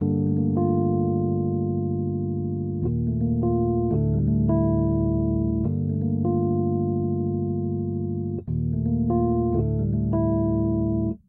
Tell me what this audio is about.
Dark Scary Movie Piano - 85bpm - Gmin
cinematic, climatic, creepy, film, horror, keys, movie, scary, spooky, suspense